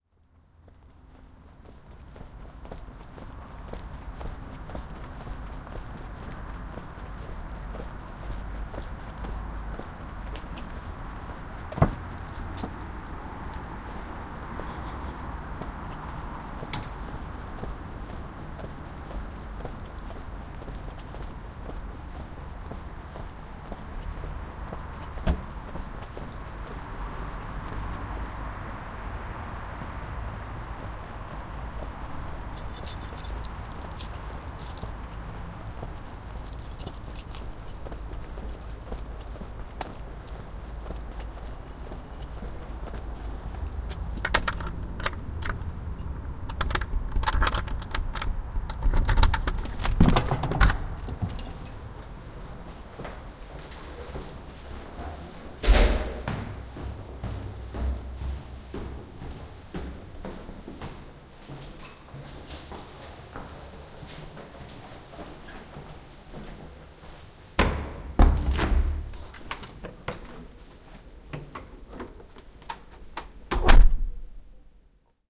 This is one of two files that I recorded on the 18th Jan 2009 at around 8.00pm at the main road outside my house. The weather was cold and very windy. The geotag has been logged with the file. Recorded onto a domestic, hand-held mini disc recorder (Sharp MD MT80HS). The files have have not been post produced, they are exactly as recorded.
This is the first attempt I have made with my new, home-built, stereo imaging microphone, built for less than £20. For those of you who may be particularly interested in making one of these, the following description may help you to experiment with your own devices.
I bought a fairly cheap Sony, stereo 'lapel mic' which I mounted onto a 400mm length of doweling wood with insulation tape. This looked like an extended 'letter T'.